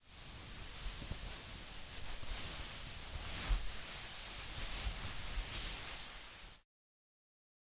Foley effect with the purpose of simulating smoke
Effects, Foley, Smoke